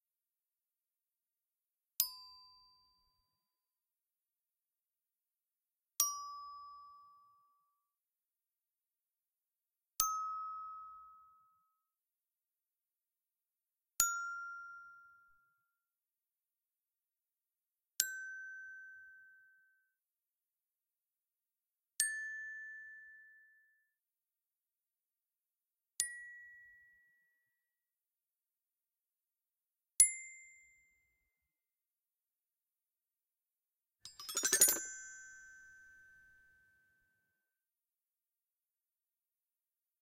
Toy Xylophone (metallic)
Recording of a metallic toy xylophone.
Ramon Di Pasquale | Sound Technician